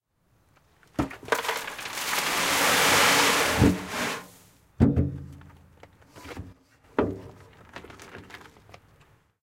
Wheelbarrow Tipped
A stereo field-recording of a partially filled wheelbarrow being tipped. Rode NT4 > FEL battery pre amp > Zoom H2 line in.
wheelbarrow, stereo, xy, field-recording, wheel-barrow